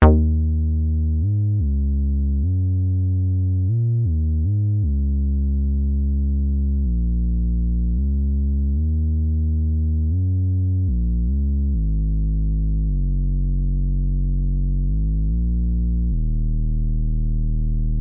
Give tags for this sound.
bass-line extreme-bass heavy-bass music song

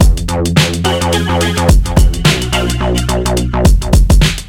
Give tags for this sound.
107 Db mixolydian

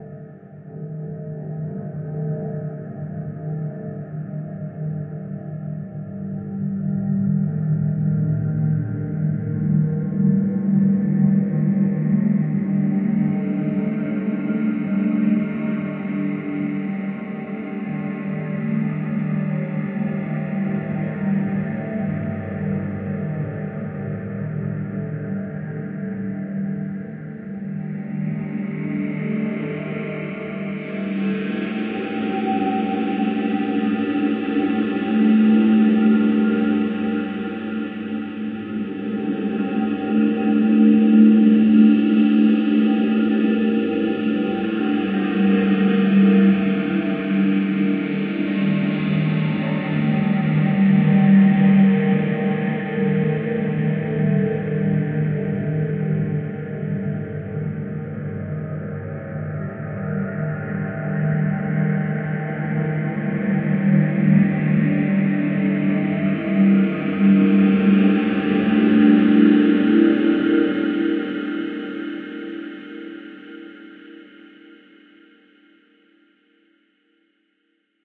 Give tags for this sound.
ambient artificial drone evolving experimental multisample pad soundscape space

